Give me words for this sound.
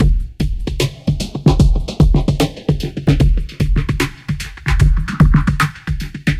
Zajo loop33 rollerbeat
remix of a downtempo beat added by Zajo (see remix link above)
delay, phaser, distorsion and compression
electro, hiphop